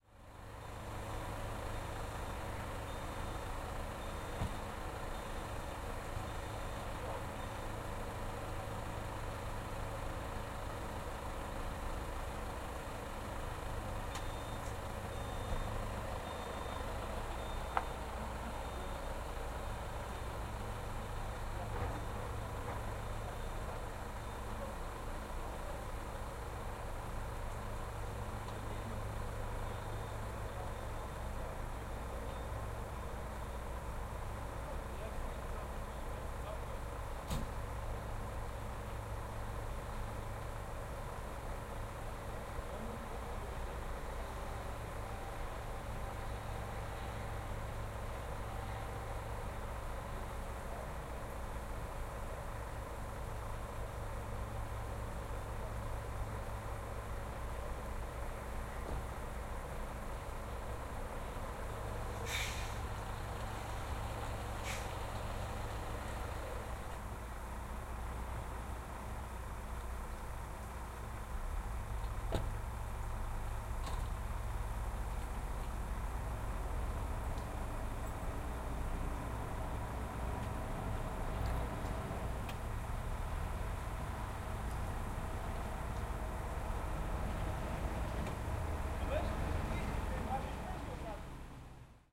110814-night ambience in padborg
14.08.2011: fifteenth day of ethnographic reserach about truck drivers culture. Padborg in Denmark. Truck base (base of the logistic company). Night ambience: recharging trucks, creaking doors, passing by cars, sizzling of the electricity pylon, some voices.